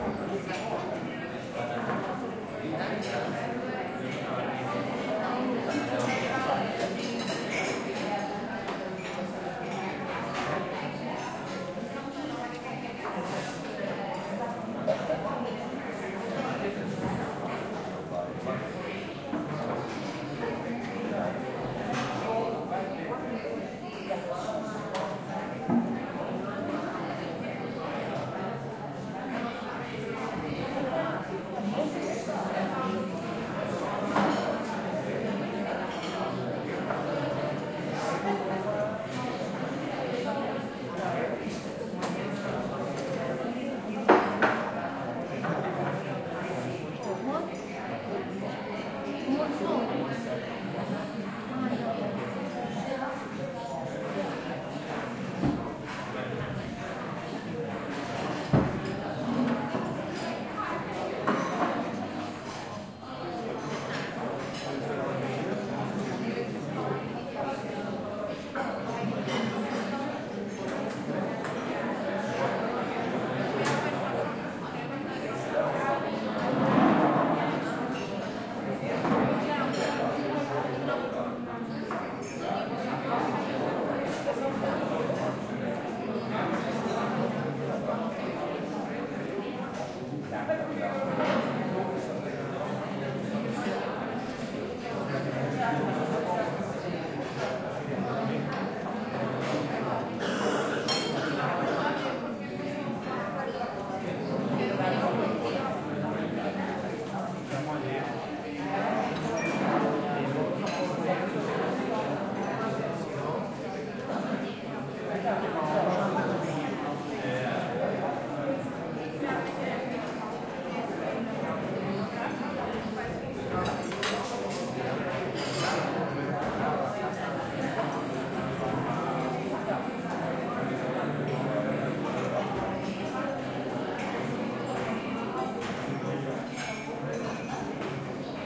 130217 - AMB INT - Breakfast @ Peckham Lodge
Recording made on 17th feb 2013, with Zoom H4n X/y 120º integrated mics.
Hi-pass filtered @ 80Hz. No more processing
Ambience of people at breakfast time on peckham lodge
peckham, breakfast, crowd